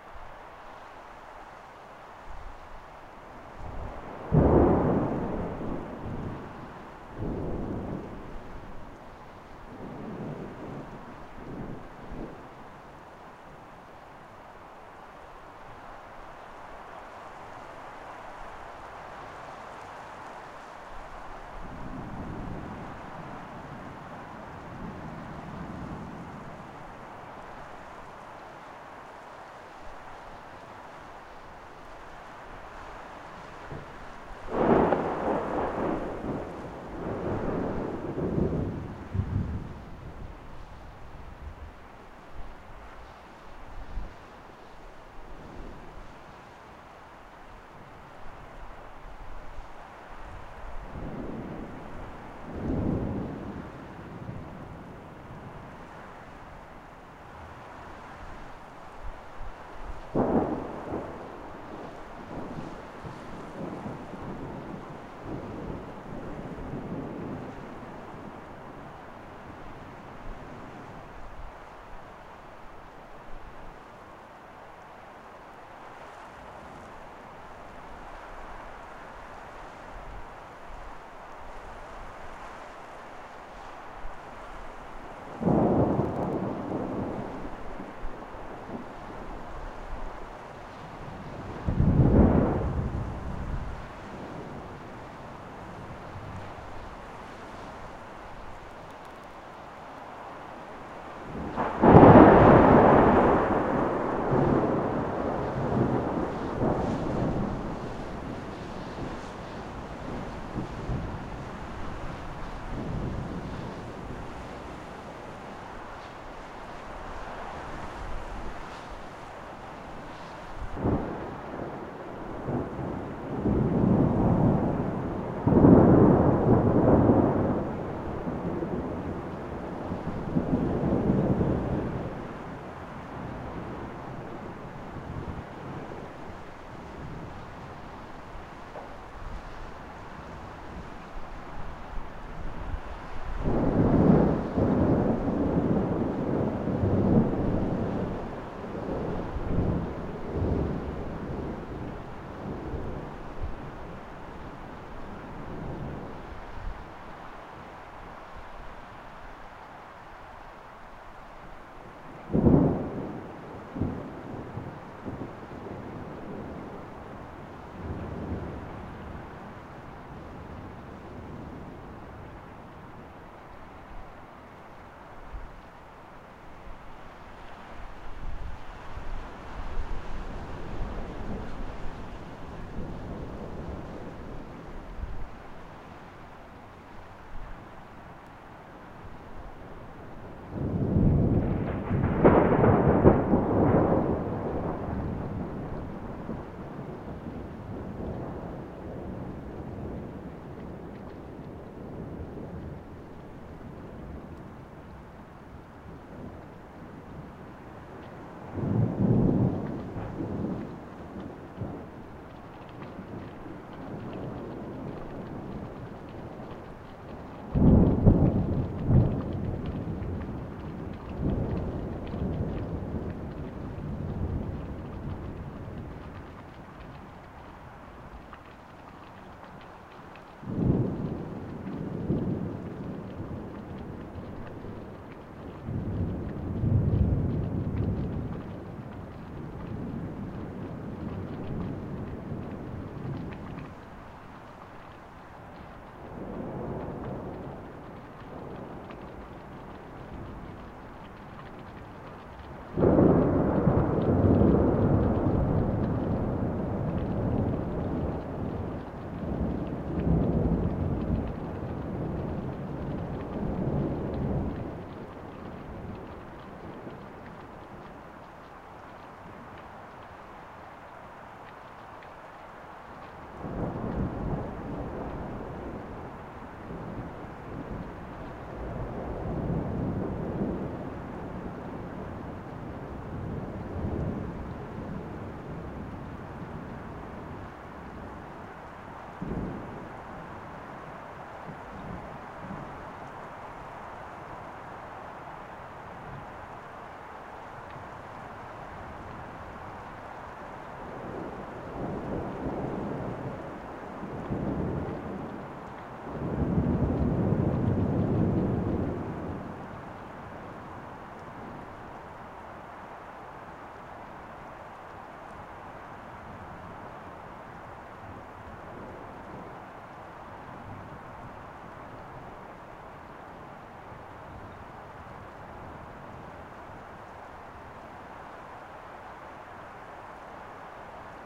full thuderstorm
Full thunderstorm which is clean with no distortion and close to peak level. Multiple loud claps and rumbles. - Recorded with a high quality mic direct to computer.